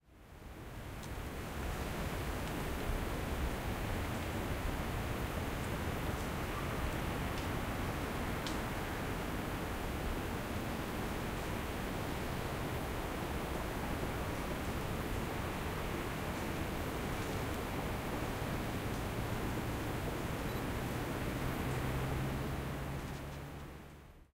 Very Quiet Forest Ambience
Field recording of a particularly quiet part of a forest.
Recorded at Springbrook National Park, Queensland using the Zoom H6 Mid-side module.
ambience, ambient, atmosphere, background, field-recording, forest, quiet